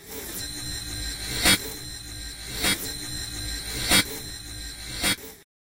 machine-short-01
A synthesized, digital oneshot - whirring into a hit. Looped and overlapping.
additive, machine, mechanics, robot, synth